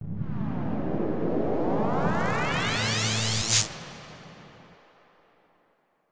A request from "zeezack" for a teleportation sound. This is an element of the final version (fx379) - a telephone buzzing noise I created with an fm synth - pitched up at two different frequencies, pitched down at another - remixed and with reverb added along with a slight electronic zap.
soundeffect, tones